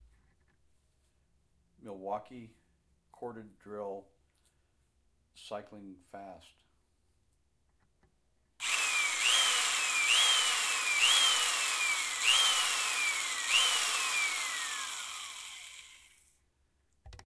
Power tool drill cycling fast (sounds cool and ominous, good for a torture scene in a horror)